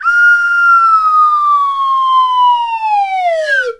a classic sound used in cartoons for a falling object. Recorded with a stereo Zoom H1 Handy Recorder.
cartoon, pitch, whistle